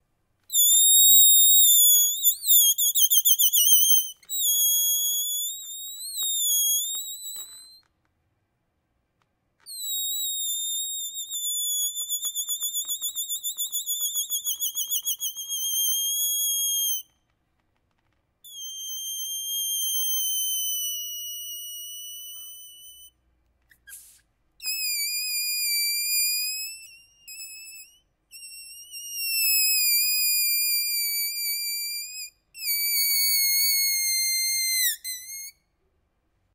sh Squeaky Balloon Air Out Multiple
The sound of air being released from a balloon, slowly, making the characteristic comical squeaking sound. Several takes. Schoeps CMC641 microphone, Sound Devices 442 mixer, Edirol R4-Pro hard disk recorder.